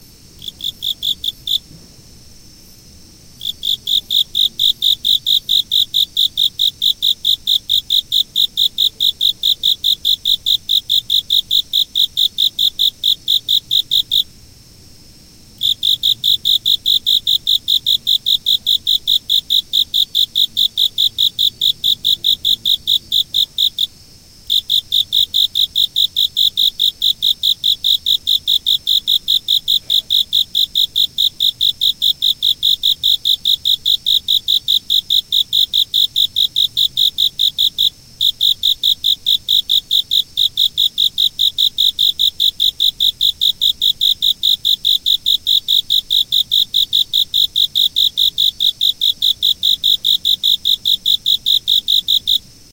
autumn insects2
autumn, fall, field-recording, forest, insects, japan, japanese, nature